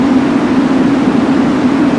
Analogue white noise BP filtered, center around 260Hz
Doepfer A-118 White Noise through an A-108 VCF8 using the band-pass out.
Audio level: 4.5
Emphasis/Resonance: 9
Frequency: around 260Hz
Recorded using a RME Babyface and Cubase 6.5.
I tried to cut seemless loops.
It's always nice to hear what projects you use these sounds for.